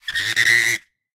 Raw audio of scraping a wet polystyrene bodyboard with my hands. Part of a sound library that creates vocalization sounds using only a bodyboard.
An example of how you might credit is by putting this in the description/credits:
The sound was recorded using a "H1 Zoom recorder" on 16th August 2017.